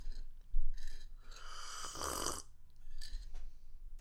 Slurping Coffee
Slirping from a cup of coffee. Self-recorded.
coffee, cup, drink, drinking, mug, slurp, slurping, tea